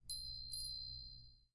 Sound of diferent types of bells